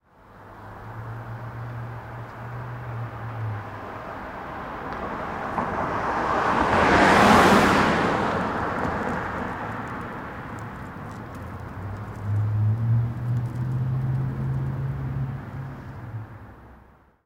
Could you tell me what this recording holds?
Car by Med Toyota SUV DonFX
by, car, pass, passing